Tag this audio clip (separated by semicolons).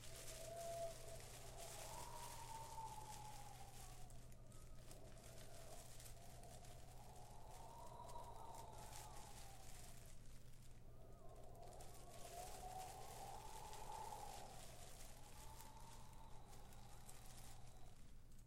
outside; trash; wind